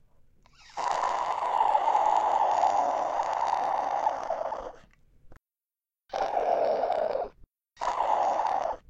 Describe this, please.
Inhuman gargling.
Recorded into Pro Tools with an Audio Technica AT 2035 through the Digidesign 003's preamps. Pitch shifted, timestretched and EQ'd for character.
creature, monster, beast, monstrous, gargle, horror, growl, zombie